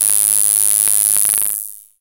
Basic impulse wave 2 G#2
This sample is part of the "Basic impulse wave 2" sample pack. It is a
multisample to import into your favourite sampler. It is a basic
impulse waveform with some strange aliasing effects in the higher
frequencies. There is a high pass filter on the sound, so there is not
much low frequency content. In the sample pack there are 16 samples
evenly spread across 5 octaves (C1 till C6). The note in the sample
name (C, E or G#) does indicate the pitch of the sound. The sound was
created with a Theremin emulation ensemble from the user library of Reaktor. After that normalising and fades were applied within Cubase SX.
multisample, reaktor, basic-waveform, impulse